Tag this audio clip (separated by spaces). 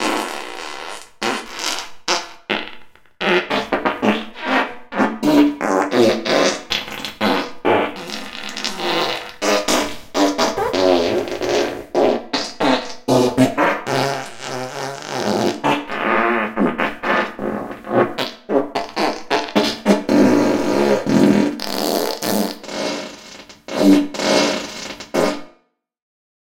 velocidad raspberry wind ferzan Geschwindigkeit passing razz flatulence brzina vitesse snelheid hastighed Fart intestinal gas blowing